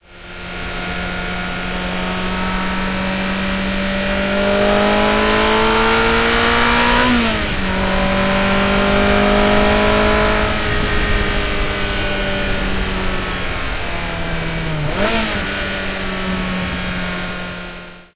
ferrari355underhood4
Ferrari 355 Spider recorded from inside engine compartment with Core Sound Binaural mics > Sony D8 DAT. One mic by intake, one by valve cover. c 1997, somewhere in Chittenden County, Vermont.
ferrari, 355, spider, underhood